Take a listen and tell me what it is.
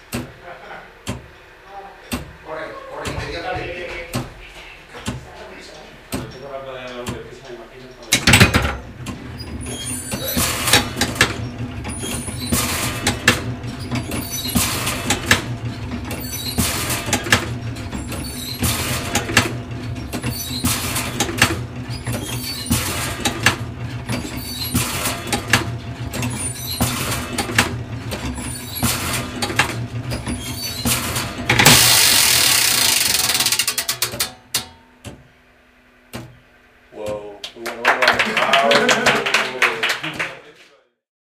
The Bell. El Campanar
This is the automatic mechanic set than move the cable and bells of a church. The church is in Extremadura, Spain. I climb to the belfry with my family for record the 11:00 AM o’clock. I love to listen the bells in a second plane. Record in a Minidisc MZ-1 with a micro stereo of SONY.